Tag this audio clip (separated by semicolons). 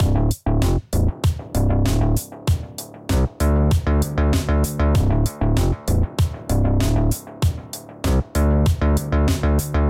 Ableton-Bass; Bass-Loop; Beat; Fender-PBass; Funk-Bass; Groove; Jazz-Bass; Logic-Loop; Loop-Bass; Synth-Bass